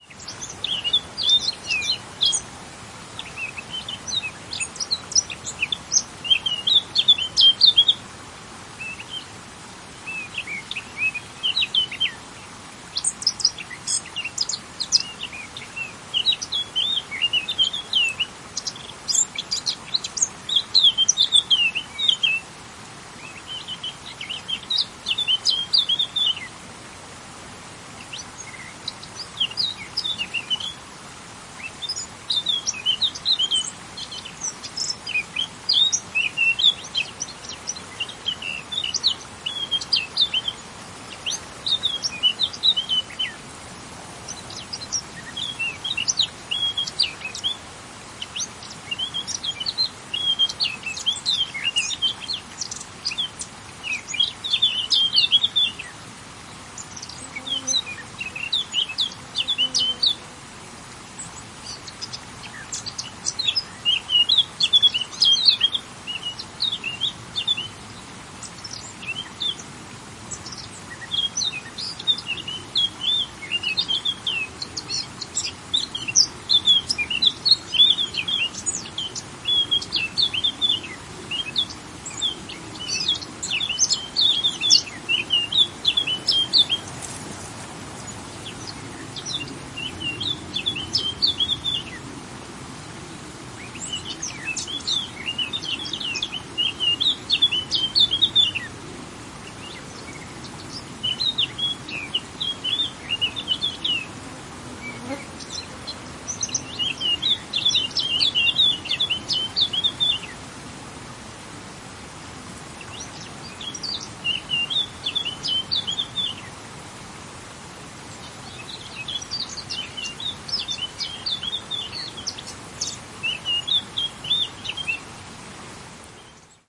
A warbler singing (two of them, actually), background with noise of running water, some insect, and wind on vegetation. EM172 Matched Stereo Pair (Clippy XLR, by FEL Communications Ltd) into Sound Devices Mixpre-3. Recorded near Mazobres Waterfall, Palencia Province, N Spain.